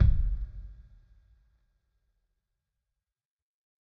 Kick Of God Bed 028
drum, god, home, kick, kit, pack, record, trash